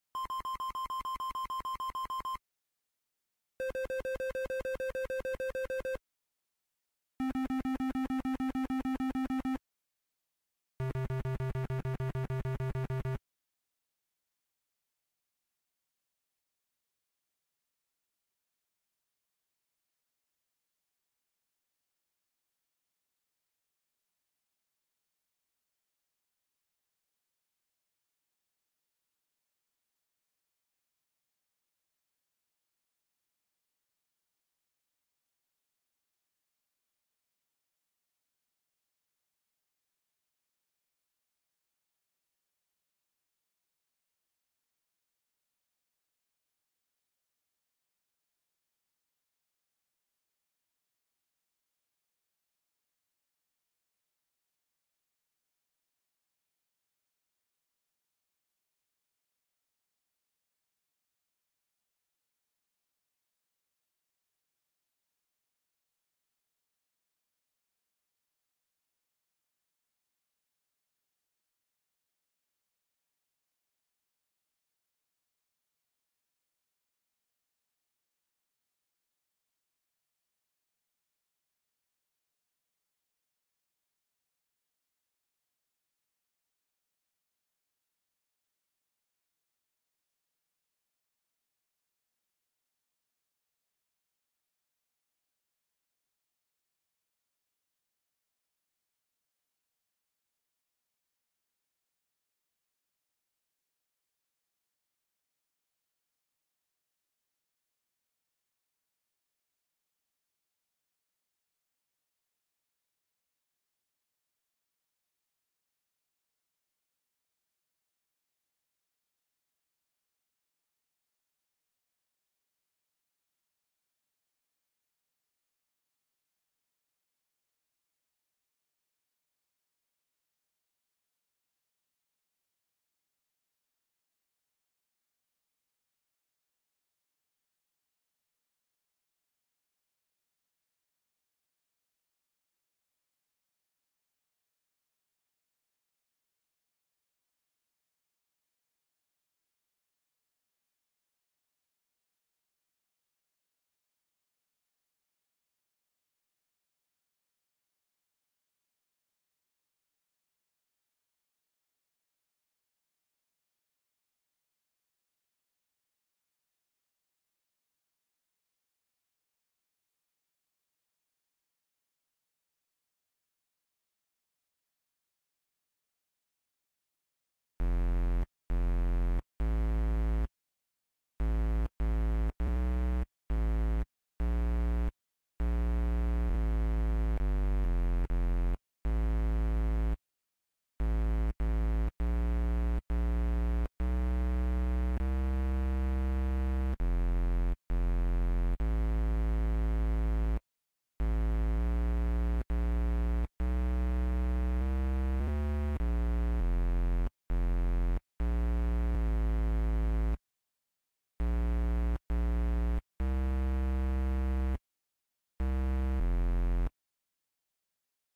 Target Lock 100 bpm beep
A target lock beep (in my mind similar to the beeps heard in the Death Star strategy session in Star Wars) playing at 100 BPM.
beep, HUD, laser, Lock, Sci-fi, ship, space, star, Target, Urgent, wars